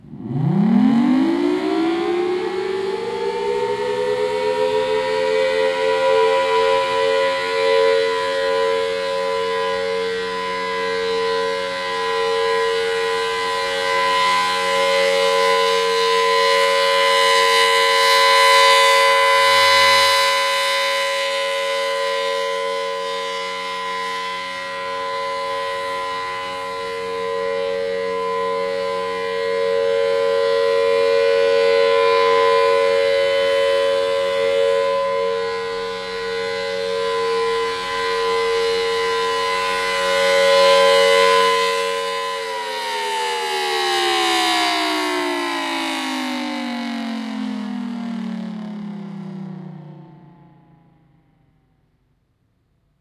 12-01-08. Monthly statewide siren test. Federal Signal Thunderbolt 1000T located on the corner of Ward Ave. and Ala Moana Blvd. Fairly low tone.
1000t, air, binaural, civil, defense, disaster, emergency, federal, hawaii, honolulu, hurricane, outdoor, raid, signal, siren, test, thunderbolt, tornado, tsunami, warning